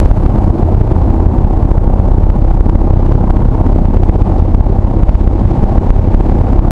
SonyECMDS70PWS digitaldeath
digital, unprocessed, test, microphone, field-recording